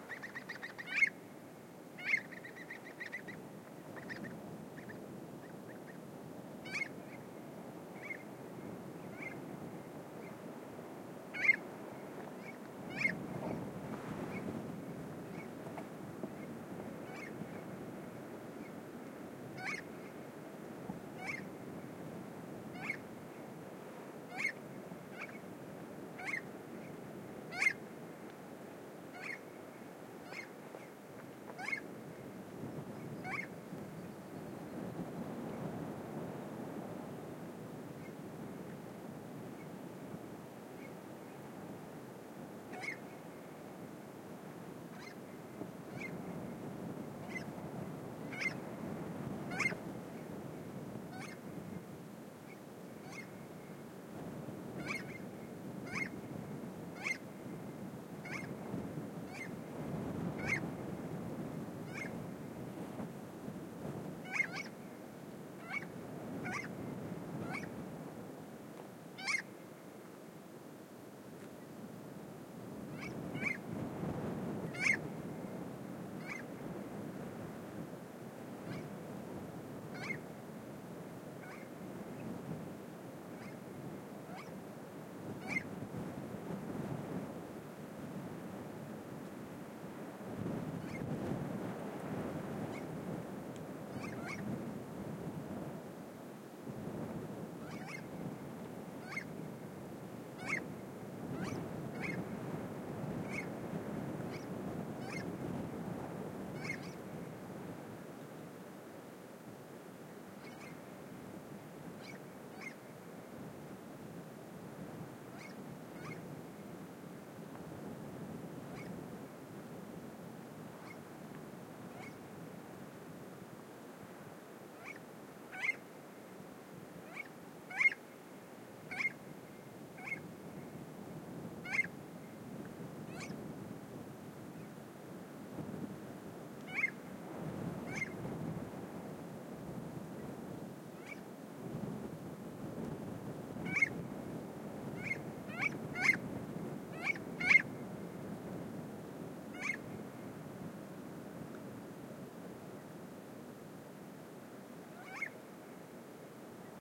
AMB altiplano tras espantar al puma
Unknown bird in recording. Ambience in the chilean highlands near the bolivian border. We were looking for a place to spot (and help scare away the llama-killing) pumas.
Rec: Tascam 70D
Mic: Two Rode M5 in a ORTF configuration.
ambience, windy, altiplano, ortf, field-recording, birds, nature